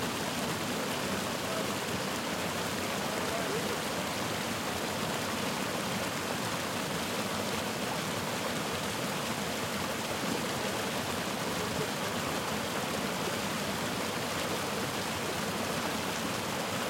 Wild Water 2
Water sounds, rushing river
ambient, atmosphere, background, general-noise, nature, noise, river, soundscape, stream, water, white-noise